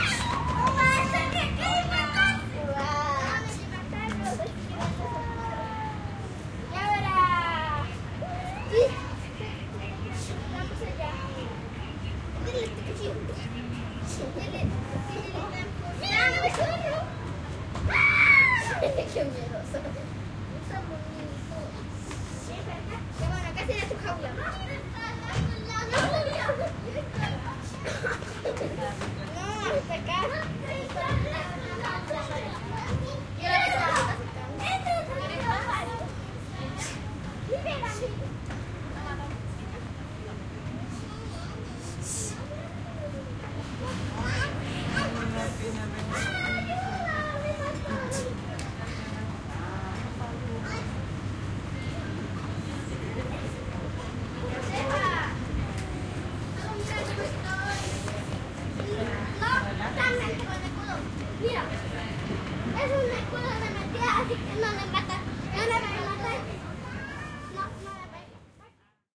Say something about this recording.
Ambience of children at a playground in a stripcenter mall in Santiago de Chile.
Recorded on a MixPre6 with LOM Usi Pro microphones.